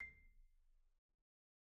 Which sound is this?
Sample Information:
Instrument: Marimba
Technique: Hit (Standard Mallets)
Dynamic: mf
Note: C7 (MIDI Note 96)
RR Nr.: 1
Mic Pos.: Main/Mids
Sampled hit of a marimba in a concert hall, using a stereo pair of Rode NT1-A's used as mid mics.
marimba, percussion, one-shot, hit, wood, instrument, idiophone, mallet, sample, orchestra, organic, percs, pitched-percussion